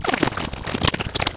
skipping / glitch / tape 1
glitchy skipping tape sound type thing
lo-fi, noise, skipping, glitch, tape